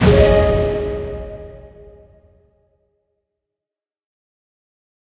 Game Reward
Designed sound meant to work as a game item pick up, bonus or reward feedback.
up, reward, pick, item, pick-up, jackpot, win, level-up, game, check-point, checkpoint